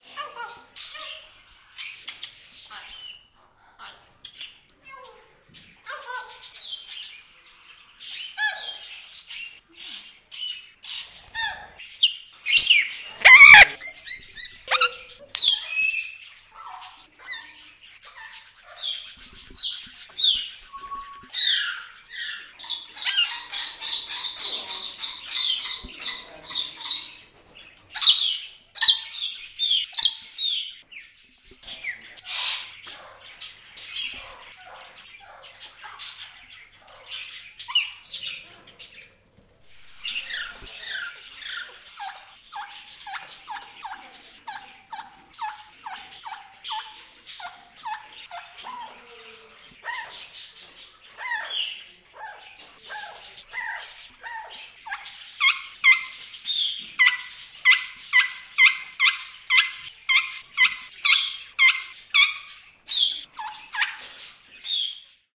This is my favourite place when live a lot of parrots which like people. This was recorded in the afternoon, with zoom H2N (xY), in Kielce, in Poland.
Animal, animales, bird, birds, birdsong, Breeding, cockatiels, exotic, exotic-birds, loro, loros, nature, pajaro, papugarnia, Papugarnia-Ara, parrot, Parrots, Pet, ptaki, relaxing, screaming, singing, squeaking, talking, zwierzaki